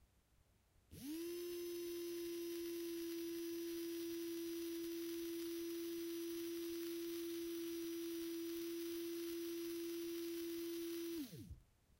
A DC gear motor, recorded with a ZOOM H1.
Brushed, DC, Electric, Electronic, Engine, Gear, Motor